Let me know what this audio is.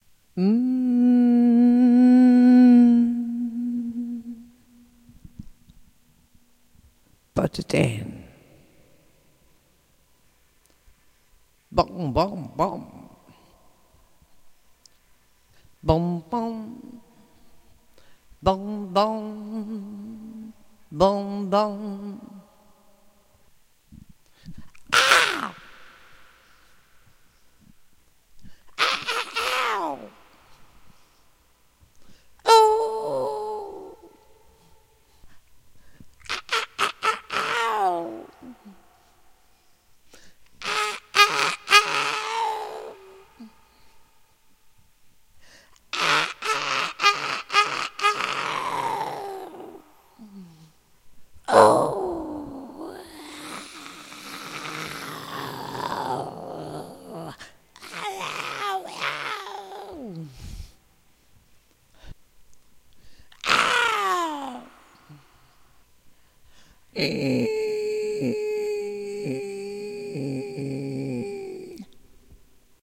Ambience FX2
ambience, ambient, atmos, atmosphere, atmospheric, background, background-sound, soundscape, white-noise